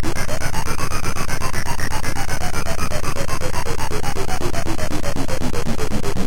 bouncing noise 153
A noisy sequenced loop from my Korg Electribe EA-1. It is a four-bar loop at 153 beats per minute.